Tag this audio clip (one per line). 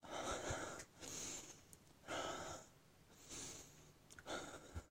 cansado
espa
male
ol
respiracion
voice